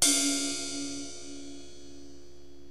splash ting 2
This was hit again with my plastic tip on a 17" ride